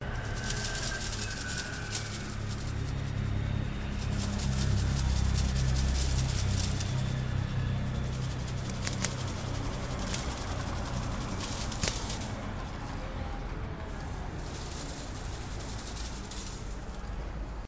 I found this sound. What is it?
The rustling sound is created by shaking dried flowers.